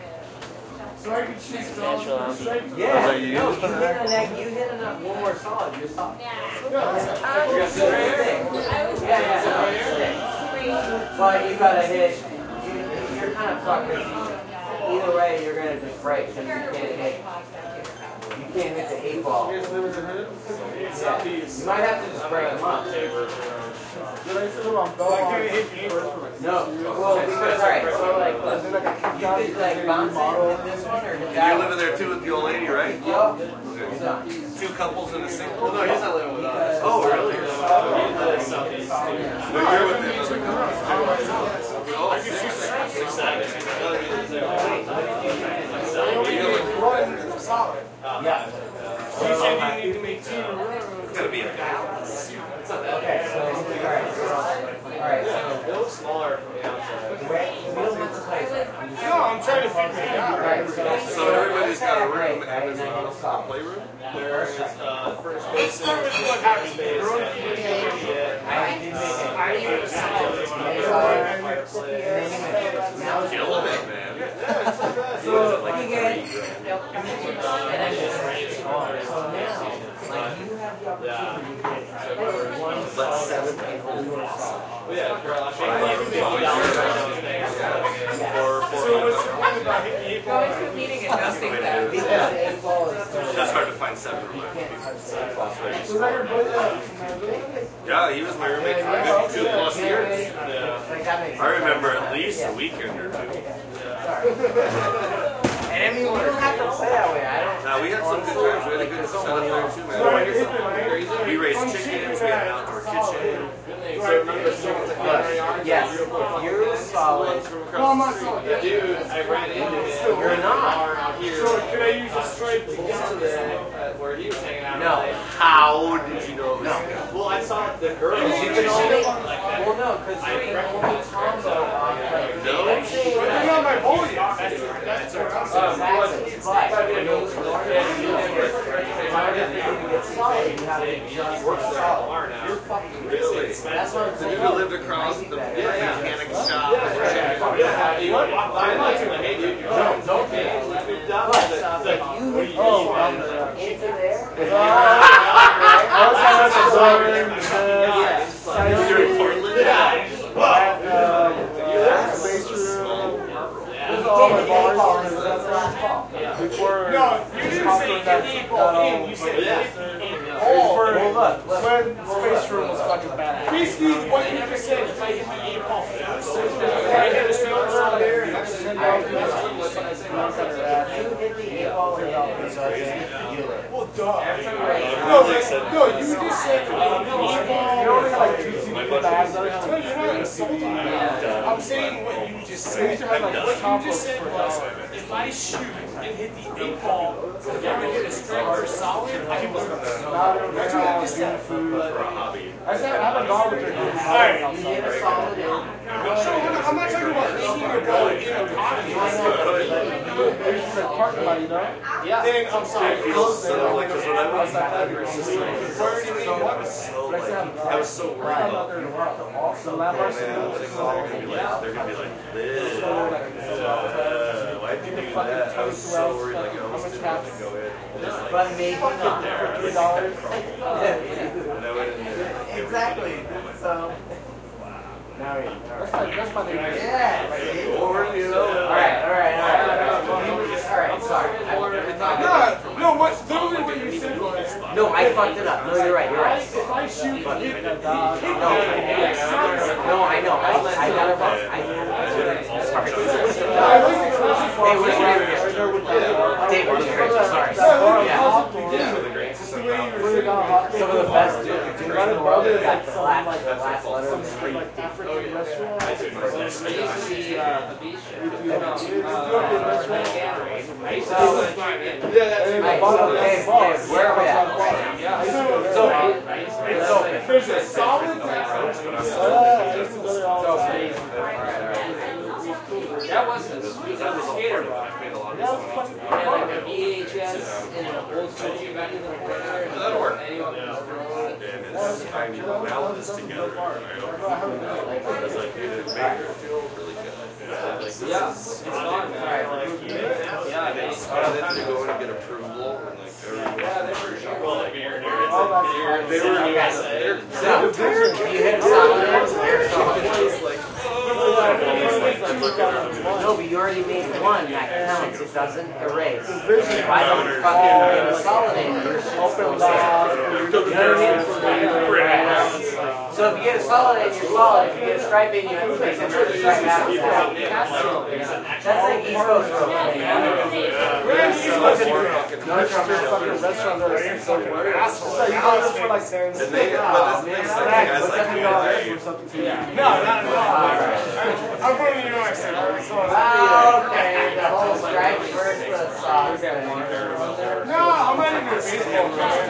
Recorded a short time where no music was playing at a bar in Portland. Recorded using Tascam DR07